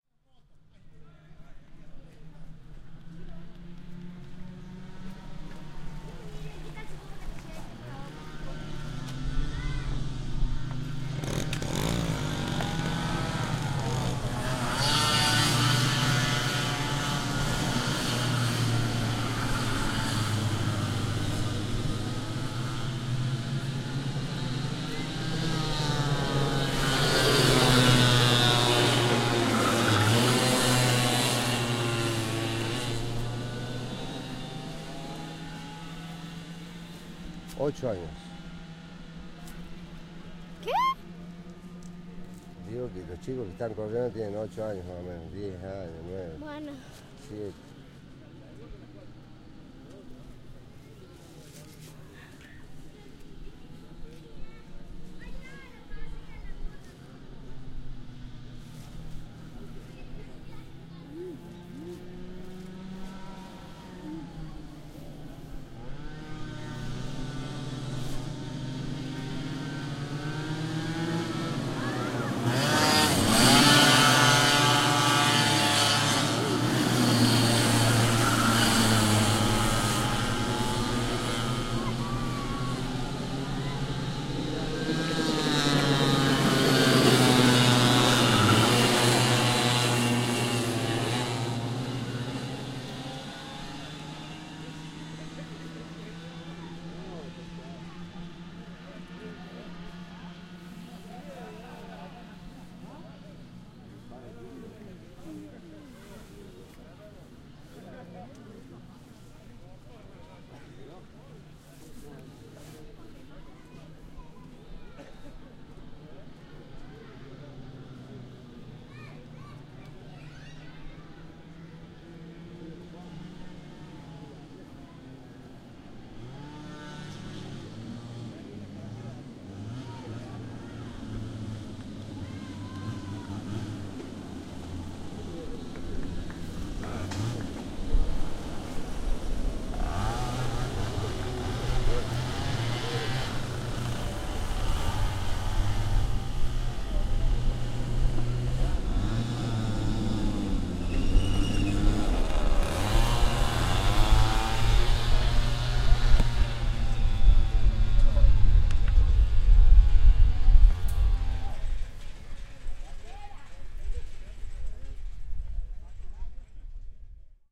Karting.CCaroya.Mayo2010.MMM.057.WormingUp
Ohhhh Fanny, so you want to race!! Well, 105kph/65MPH, 8.000rpm at main straight
engine, karting